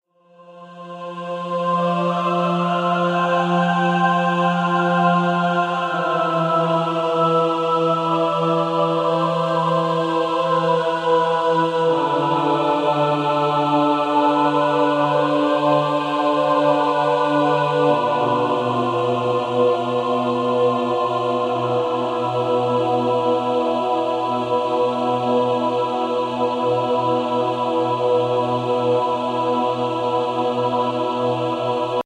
voices cine
these vocals is apart of the other epic music. they sound really beautiful solo